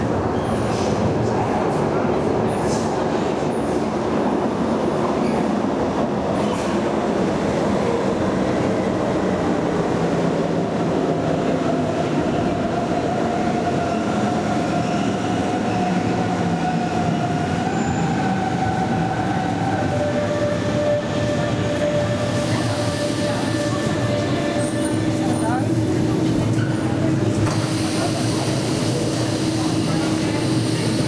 Arrival of a commuter train class 474 of the S-Bahn Hamburg in the underground station of Hamburg-Harburg. After the arrival people bord the train.